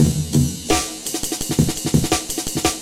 A mangled Amen breakbeat